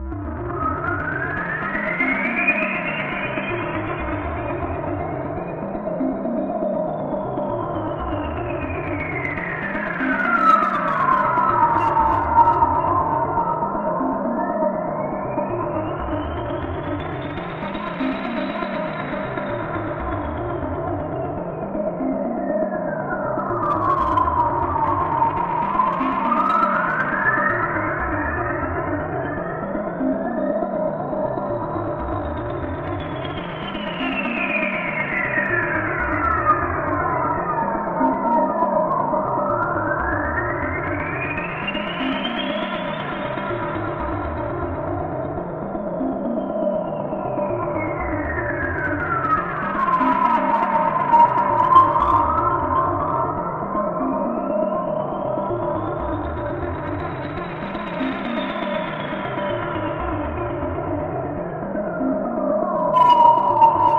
ARP A - var 7
ARPS A - I took a self created Juno (I own an Alpha-Juno 2) sound, made a little arpeggio-like sound for it, and mangled the sound through some severe effects (Camel Space, Camel Phat, Metallurgy, some effects from Quantum FX) resulting in 8 different flavours (1 till 8), all with quite some feedback in them. 8 bar loop at 4/4 120 BPM. Enjoy!
feedback, 120bpm, arpeggio, sequence, juno, melodic